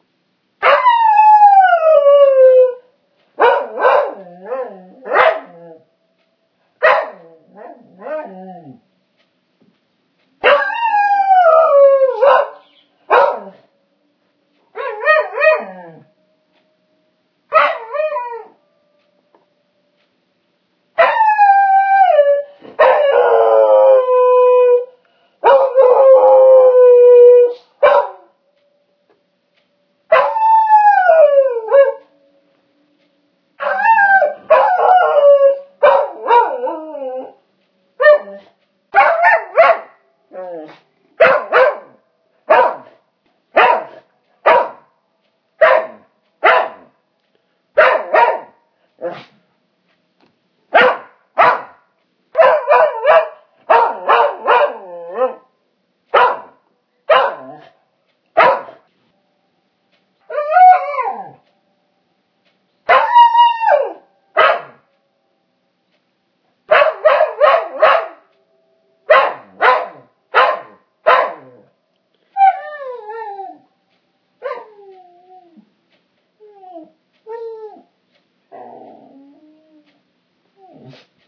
Dog Full Suite
***FULL SOUND RECORDING***
The other sounds in the pack were made from this recording. There are some that aren't in there. Could be nice to have a continuous dog in the background, so here's the entire recording.